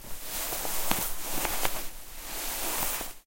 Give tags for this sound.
swish; bed

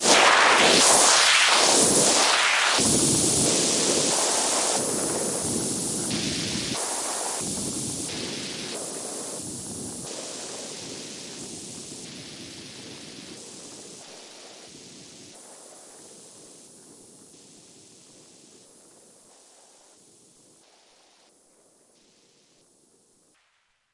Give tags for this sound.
effect; electronic; fx; sample; sfx; sweeping; woosh; Yamaha-RM1X

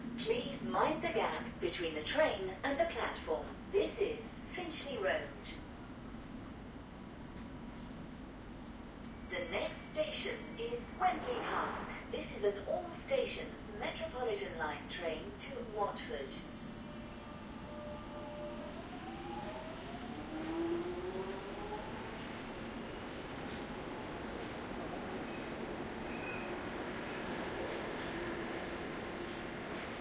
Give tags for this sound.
ambiance; london; binaural; tube; qmul; ambience; field-recording; c4dm

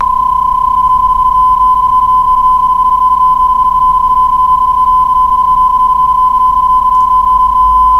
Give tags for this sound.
31; baud; bpsk; data; ham; radio

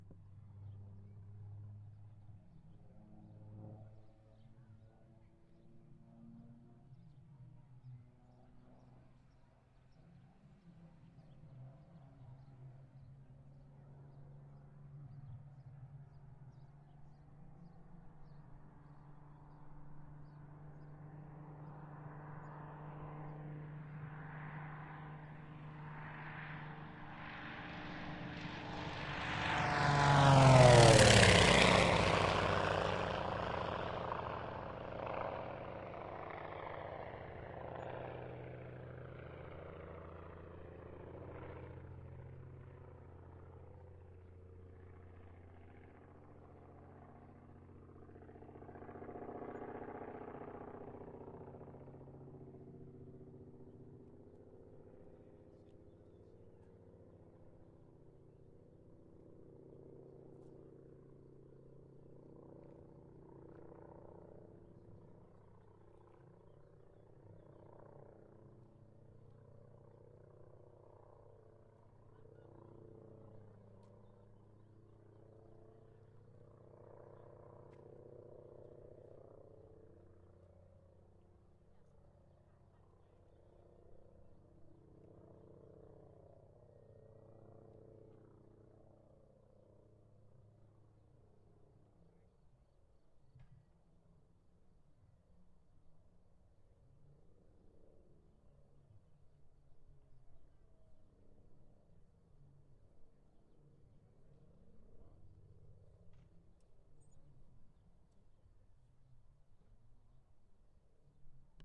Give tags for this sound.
Hz; airplane; h4; zoom; bimotor; c-45; f; plane; fnk; channels

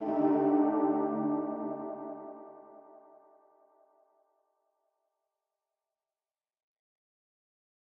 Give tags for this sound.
chord; melody; calm

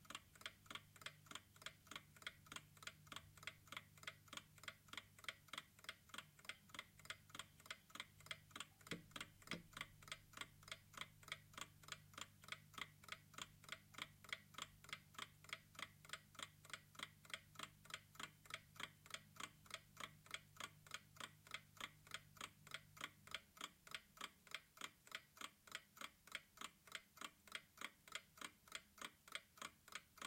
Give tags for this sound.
alarm-clock; metal; old; ticks; tick-tock

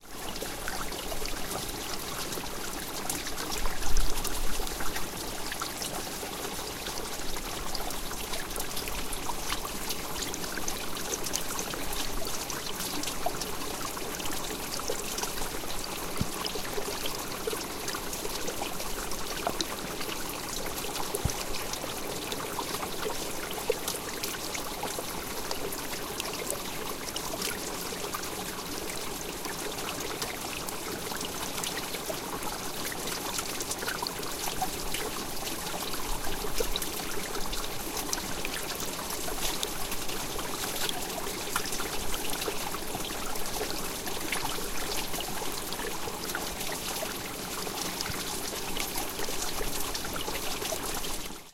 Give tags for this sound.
snow; greenland; agua; ruisseau

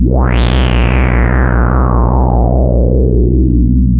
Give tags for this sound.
evil; horror; subtractive; synthesis

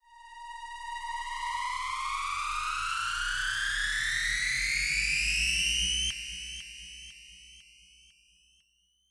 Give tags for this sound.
dance; samples; club

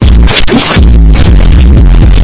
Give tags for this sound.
wobbly; noisy; glitch; acid; loop; deconstruction; effects

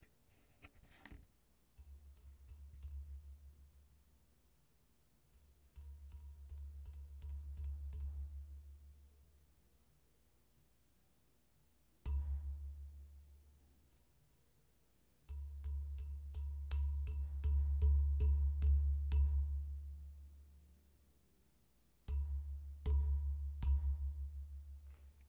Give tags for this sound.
banging; Pole; poles

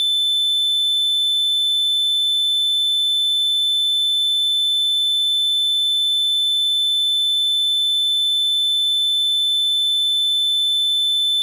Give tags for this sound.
analog; modular; triangle-wave; raw; A-100; analogue; VCO; oscillator; wave; electronic; A-110-1; synthesizer; multi-sample; waveform; Eurorack; basic-waveform; sample; triangle; triangular

